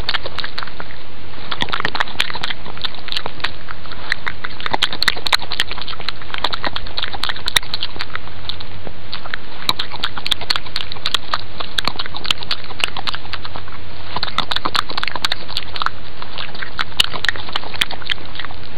A badger eating peanuts. I have no idea why anyone would want this! :) Maybe you need authentic animal eating sounds? I live in a forest, and I leave peanuts out for the local badgers. They are very noisy eaters.